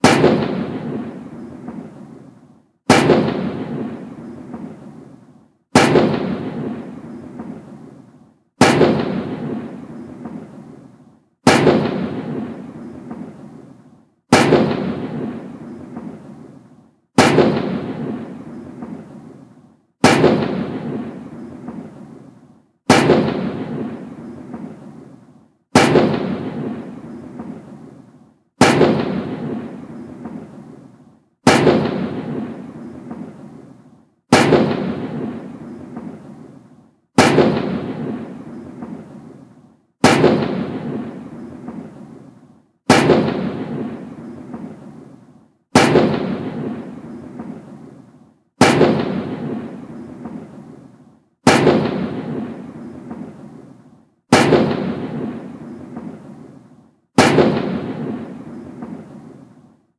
21 Gun Salute
1 Minute sample loop of a table slam (putting a cup on a steel table next to an iPod 5 microphone) and fireworks explosion field recording, Easter Saturday, Neutral Bay, Sydney, Australia.
1-Minute, 21, 21-Gun, 21-Gun-Salute, Australia, Cannon-Fire, Fire, Firework, Gun, Gunshot, Minute, Ringtone, Salute, Sydney